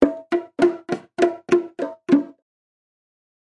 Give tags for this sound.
loops tribal bongo Unorthodox

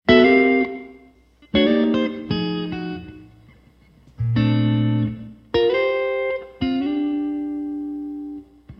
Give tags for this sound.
jazz guitar chords

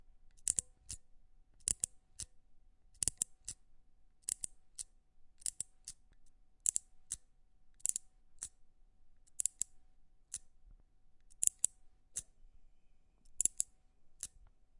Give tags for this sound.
fx insect percussive toy

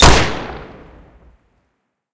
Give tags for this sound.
Firearm Gunshot Pistol

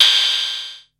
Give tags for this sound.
cs-15 yamaha hihat analog

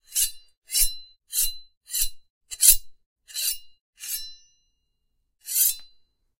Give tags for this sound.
Medieval War

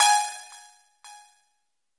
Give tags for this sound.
delayed reaktor multisample mallet electronic

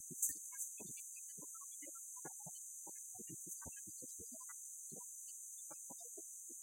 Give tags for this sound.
Hum MACHINE MOTOR Operation POWER industrial machinery mechanical

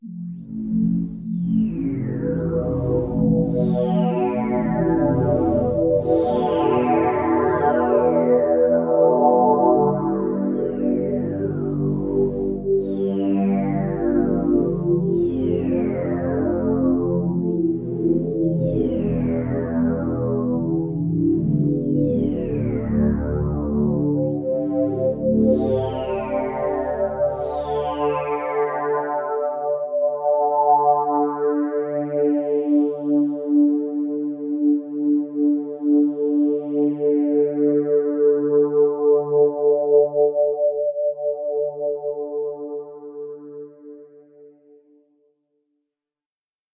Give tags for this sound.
random sequence

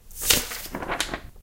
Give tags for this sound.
calender date paper turn turn-over

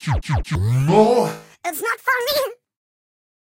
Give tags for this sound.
8-bit awesome chords digital drum drums game hit loop loops melody music sample samples sounds synth synthesizer video

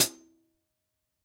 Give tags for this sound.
drum heavy hi-hat hihat kit metal rockstar tama zildjian

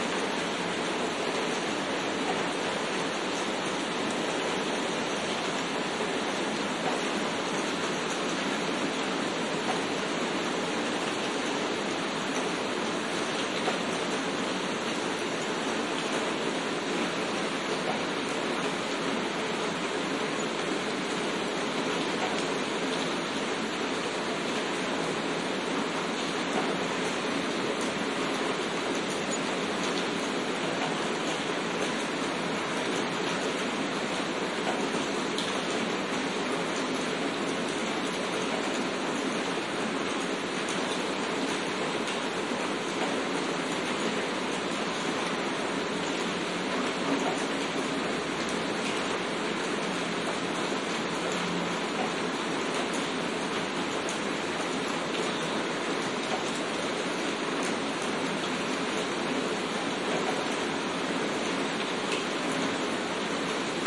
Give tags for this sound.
Binaural City Night Noise Rain Skopje

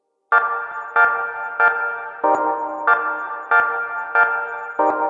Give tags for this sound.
DuB rasta HiM Jungle onedrop roots

